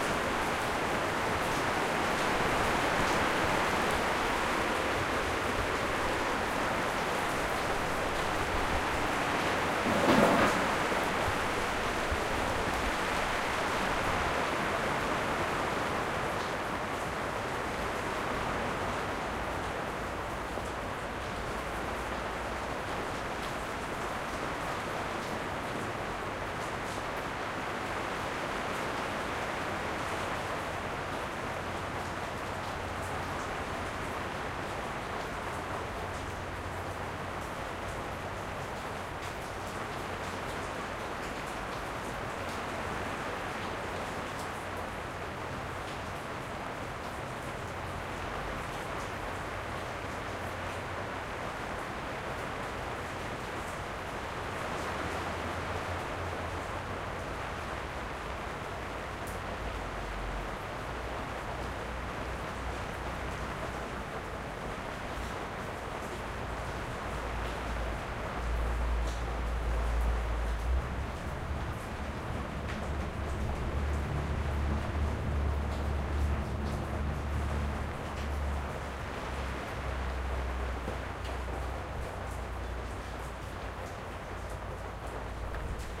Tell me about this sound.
Ambience EXT day heavy rain rooftop (eka palace lisbon)
Field Recording done with my Zoom H4n with its internal mics.
Created in 2017.